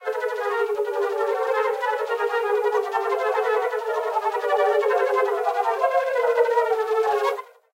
flute vibrato
flute processed samples remix